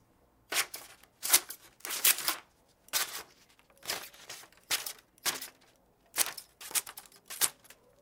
cutting paper
sound, intermediate, class